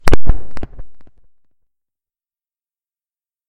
Distant Gunshot 2 (Mono)
A distant gunshot SFX created by heavily, heavily editing me thwacking my microphone, in Audacity.
Distant, Firefight, Gunfire